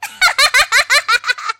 WARNING: might be loud
another laugh for my OC
minkie, pie, obsidian, laugh